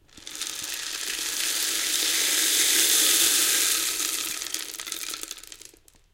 RAIN STICK A 007
This sample pack contains samples of two different rain sticks being played in the usual manner as well as a few short incidental samples. The rain stick is considered to have been invented in Peru or Chile as a talisman to encourage rainfall however its use as an instrument is now widespread on the African continent as well. These two rainsticks were recorded by taping a Josephson C42 microphone to each end of the instrument's body. At the same time a Josephson C617 omni was placed about a foot away to fill out the center image, the idea being to create a very wide and close stereo image which is still fully mono-compatible. All preamps were NPNG with no additional processing. All sources were recorded into Pro Tools via Frontier Design Group converters and final edits were performed in Cool Edit Pro. NB: In some of the quieter samples the gain has been raised and a faulty fluorescent light is audible in the background.
rain, chilean, shaker, instrument, rattle, percussion, peruvian, rainstick, storm, weather, ghanaian, stick, chile, ghana, peru